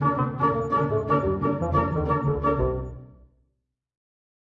Comedy Music Samples 042
Comedy Music Samples
animado, cartoons, comedia, comedy, dibujos, film, fun, funny, humor, infancia, infantil, samples, story